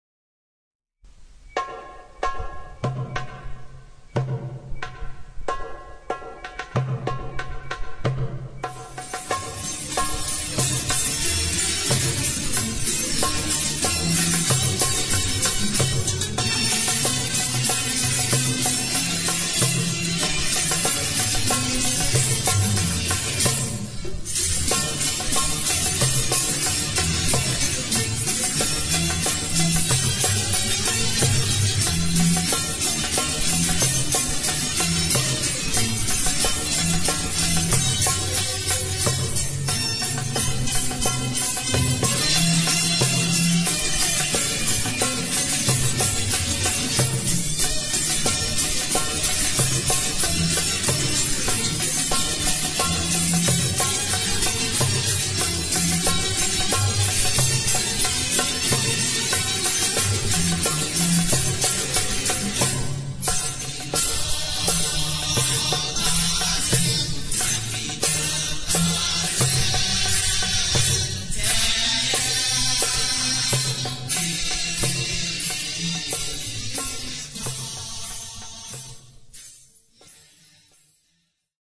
Bassit Mahzuz Rhythm+San'a
Bassit Mahzúz (intermediate) rhythm with ornaments, applied to the San'a "Qalbí Hasal" of the mizan Basít of the nawba Gharíbat al-Husayn
andalusian, arab-andalusian, bassit, compmusic, derbouka, mahzuz, orchestra